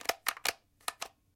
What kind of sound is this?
Whisk Reload 03
Clicking a whisk button to emulate a handgun reload sound.
handgun, whisk, gun, clip, weapon, magazine, reload